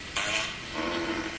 uh uh fart
fart, flatulence, flatulation, gas, poot